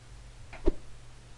Some fight sounds I made...
hit, leg, fighting, combat, fist, punch, fight, kick